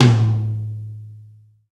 mid tom
tom, tom tom, drum kit tama percussion hit sample drums
tama, drum, percussion, sample, tom, hit, drums, kit